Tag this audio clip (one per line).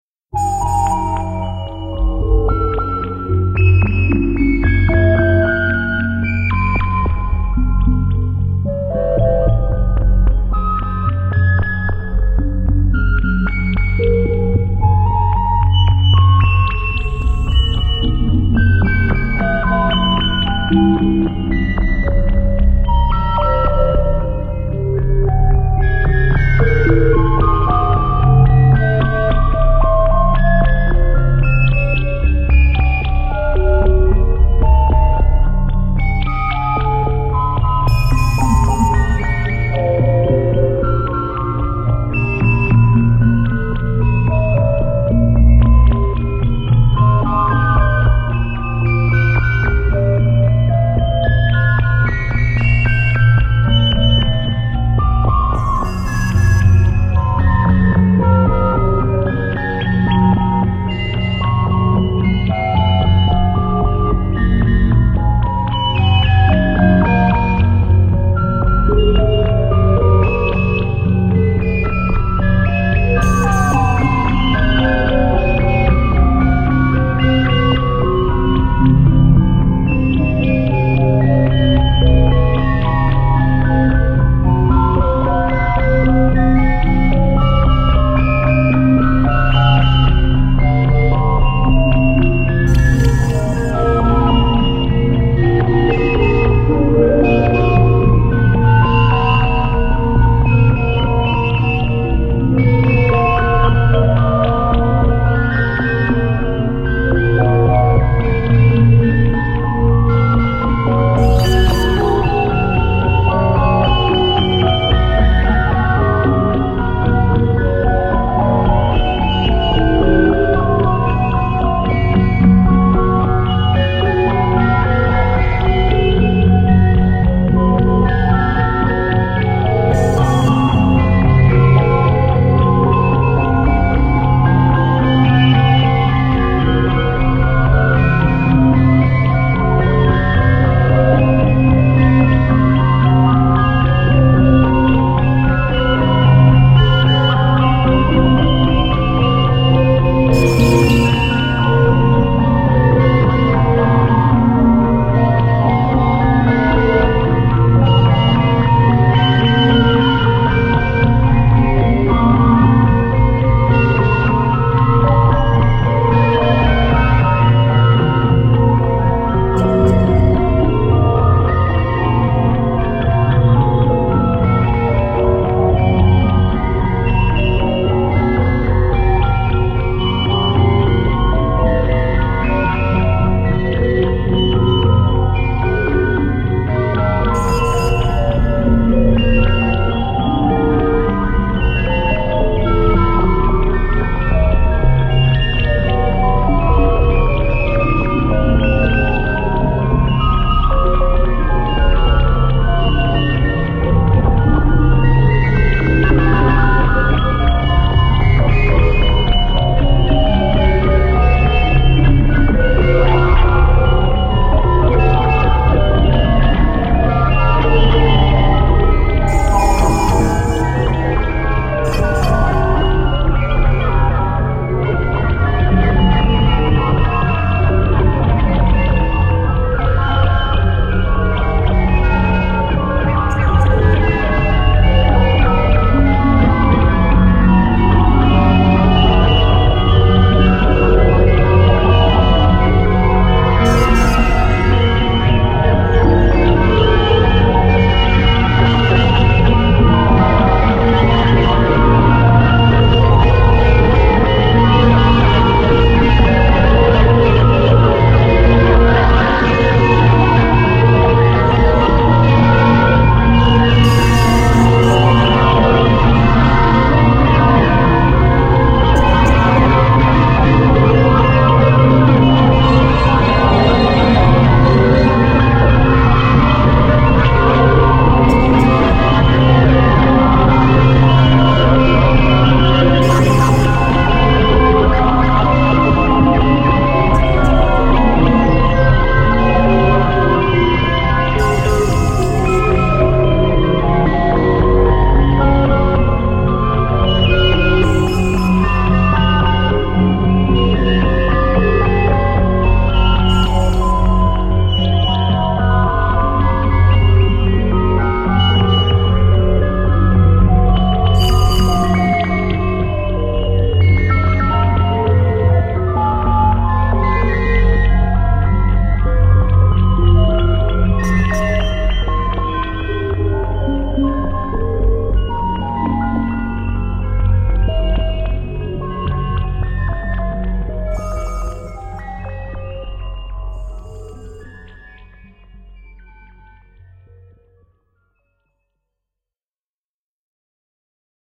ADPP
ambient
atmosphere
atonal
background
creepy
dark
drama
electronic
experimental
haunted
horror
music
noise
scary
science-fiction
sci-fi
sinister
spooky
suspense
thriller
weird